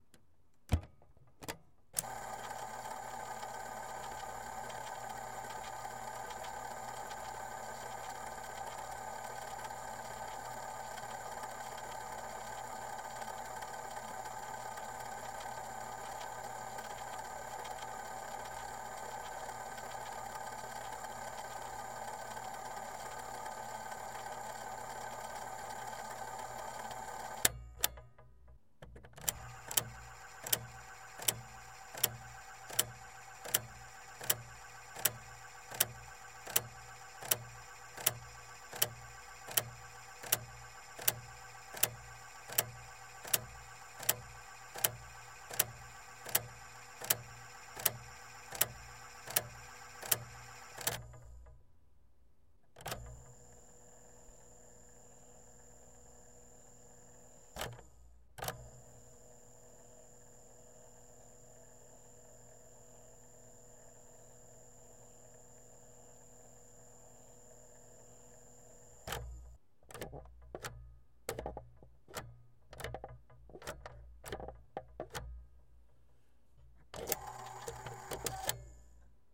Recording of various sfx made by a vintage radio with a closed tape deck. Recorded with a Tascam DR60D Mkii and a Rode M5 in a controlled room.